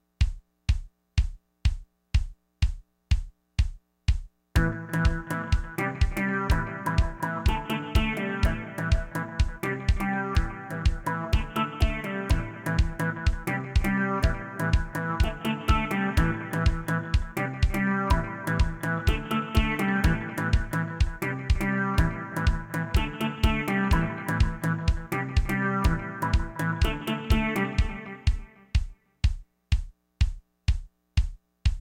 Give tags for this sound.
beat; loop

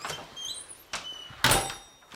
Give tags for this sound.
Door; Closing; Metal-latch

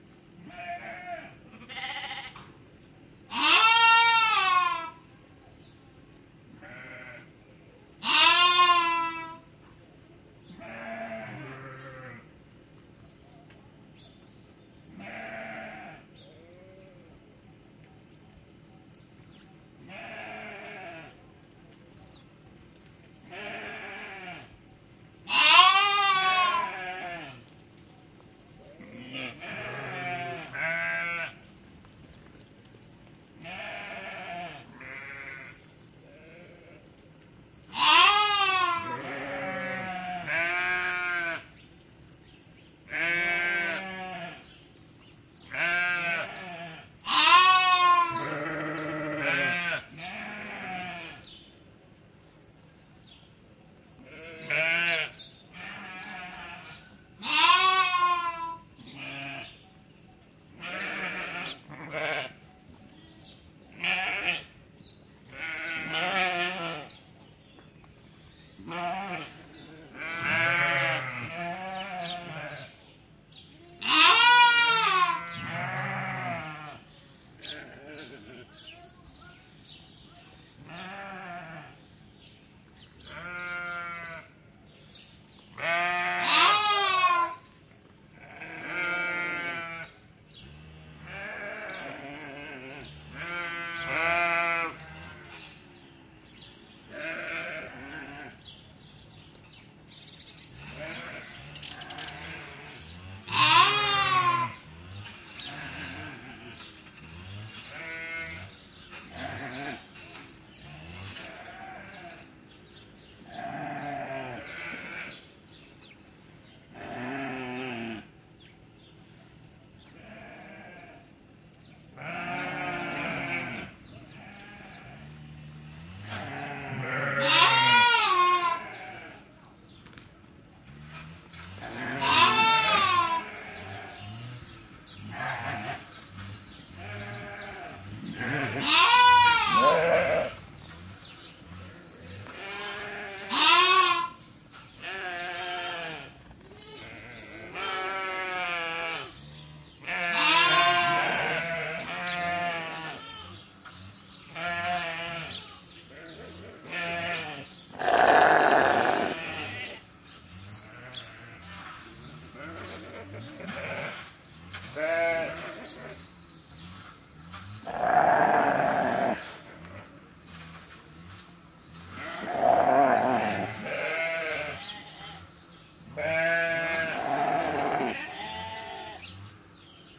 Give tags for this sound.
field-recording; spain; lamb; sheep; farm; bleating

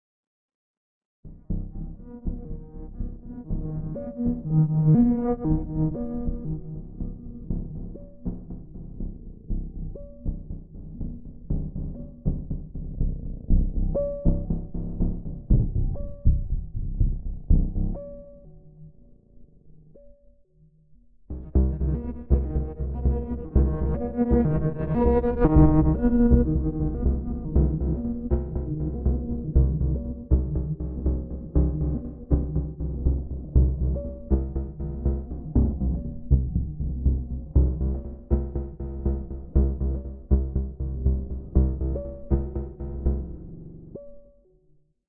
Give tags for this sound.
soundscape; space; rhythm

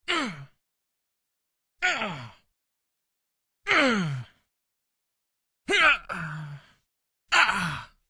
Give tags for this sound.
hurt; man; pain; gunt; grunt; yell; moan

